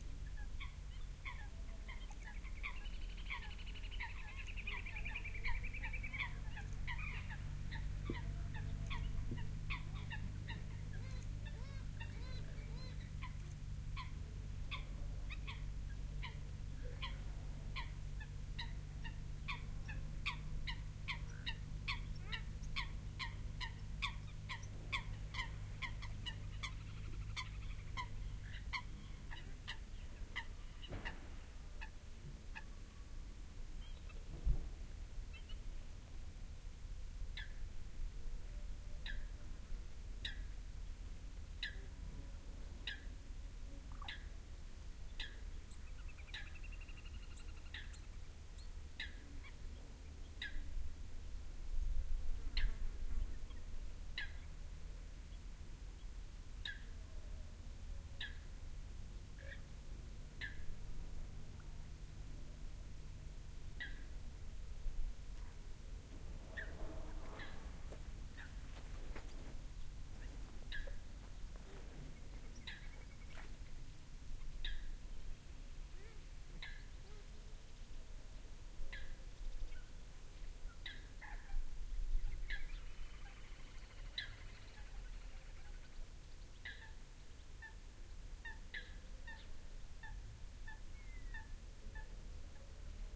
ambiance near a pond in south Spain, mostly bird calls. sennheiser me66+AKG CK94-shure fp24-iRiver H120, decoded to mid-side stereo
ambiance, autumn, birds, nature, pond